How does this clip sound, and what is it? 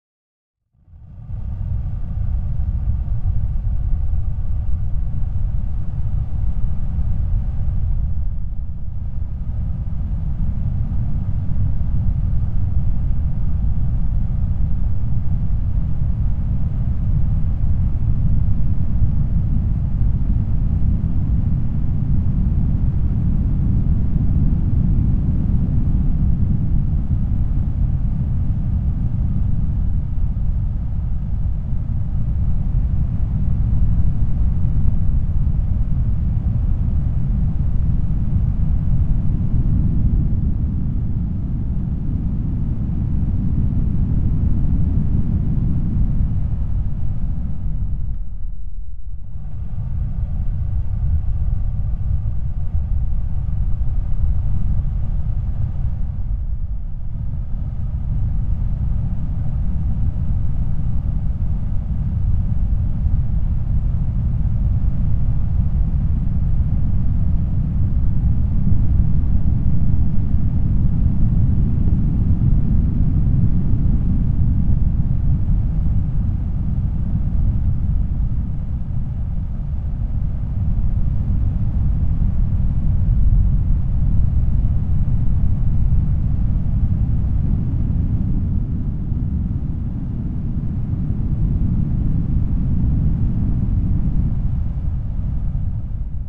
Raging thunderstorm
I synthesized a sound that sounds like the rage of a thunderstorm
lighting, rainstorm, synthesizer, thunder